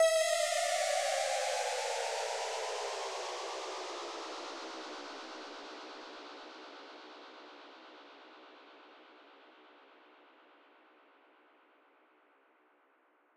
FX Laser house falling 5 128
Falling effect frequently used in electro house genre.
shots, house, dance, synth, electro, effect, laser, fx, falling